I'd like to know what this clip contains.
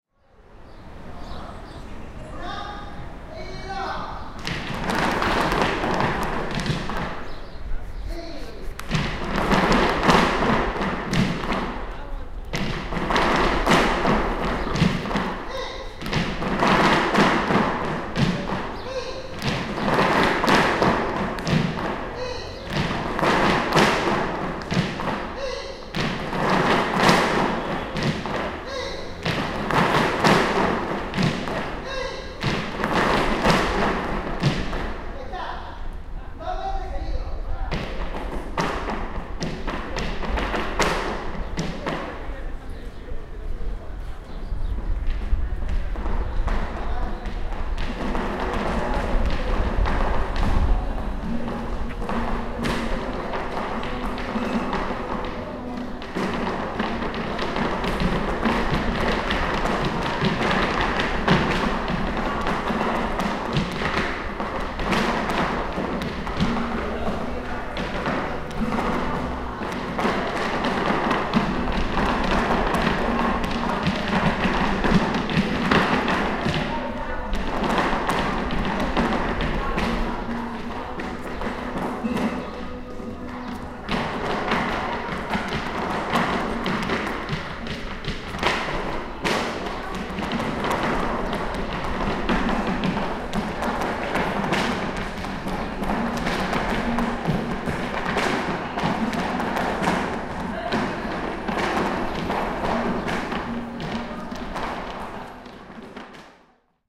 Flamenco classes
Sound coming from open windows in distance about 10m